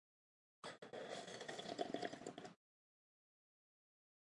2. sorbiendo cafe
tomando cafe foley
Hot, coffe, drinking